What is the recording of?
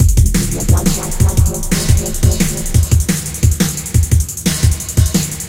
Drum n bass loop with slight phase effect and delay on cymbal